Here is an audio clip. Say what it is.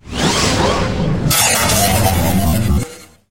Morph transforms sound effect 5
impact, futuristic, metalic, hit, noise, atmosphere, transformer, woosh, drone, stinger, glitch, moves, Sci-fi, morph, abstract, opening, game, background, horror, metal, dark, rise, transition, transformation, scary, cinematic, destruction